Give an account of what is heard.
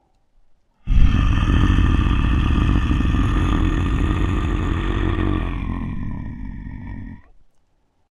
Some horror sounds I recorded.
Thanks very much. I hope you can make use of these :)